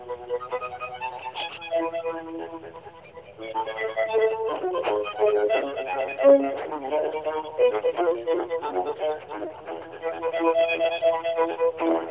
Orchestral Phone Message 10
recorded on a phone, mysteriously, as a message on my answering machine. I have no knowledge as to who recorded it, where it was recorded, or whether it came from a live performance or not. All of the segments of this set combine sequentially, to form the full phone message with the exception of this, and the following sample. There was a momentary pause in the message that contained a short, fumble sound as it stopped, followed by a brief period of silence, and then another, nearly identical fumble sound as the music began to play again. if you are interested in the fumble sounds, they are labled "Phone Bump [1-5]"
bad, bass, cello, cheap, dirty, glitch, glitchy, message, orchestral, phone, recording, viola, violin